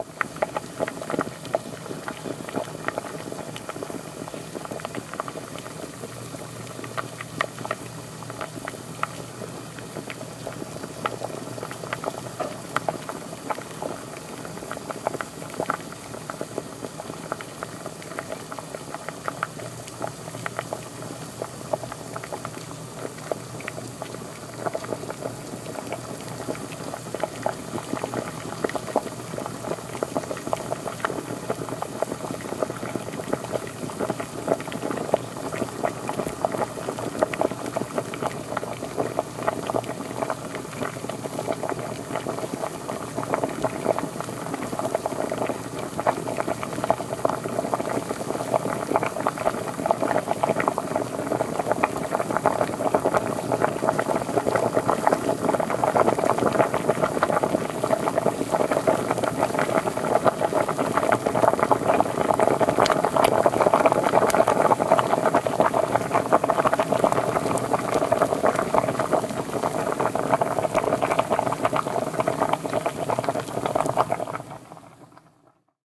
Pot of Water Boiling
the sound of noodles boiling in a pot
boiling, cooking, pot